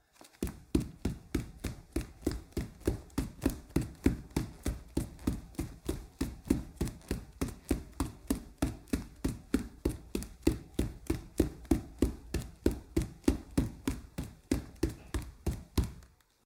01-29 Footsteps, Wood, Barefoot, Running 1
Running barefoot on a wood floor
running, barefoot, wood, footsteps, hardwood